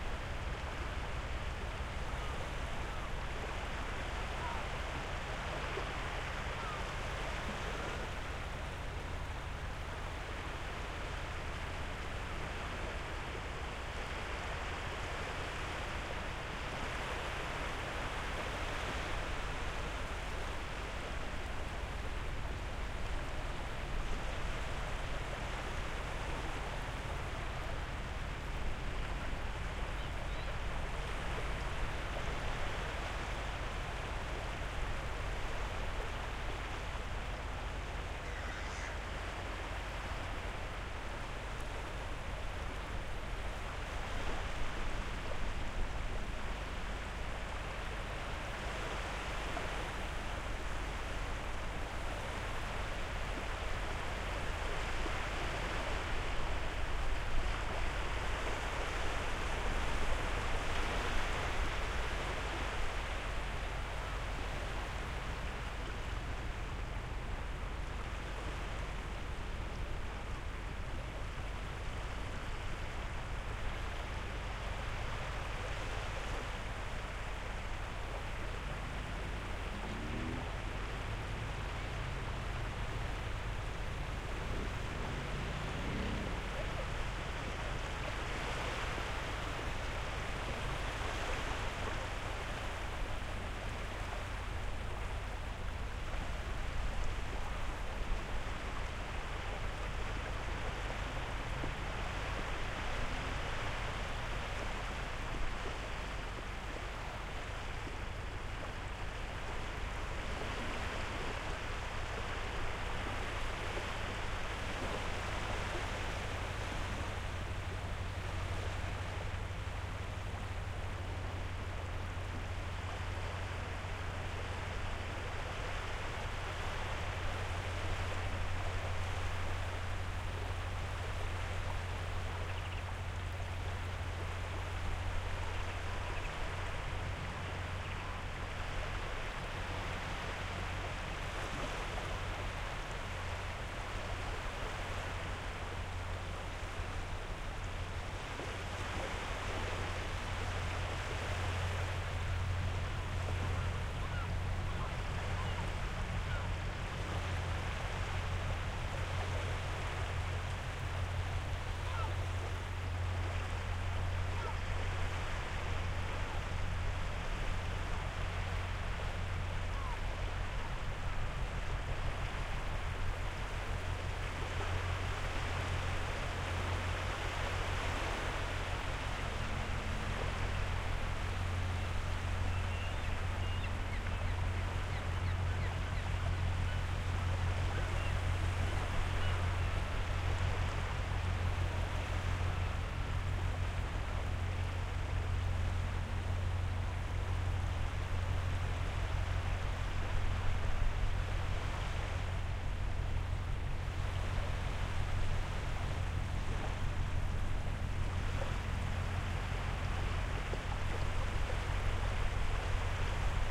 Moray coast
Recording near the shore of the Moray Firth / Scotland. In the distance a ship was moored and a constant hum came from that ship. Recorded in October 2012 using the BP4025 microphone, a Shure FP24 preamp into a Korg MR 2.
coast, field-recording, Moray, Nairn, Scotland, seagulls, ship, waves